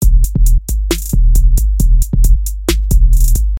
A 2-bar drum and sub bass trap beat.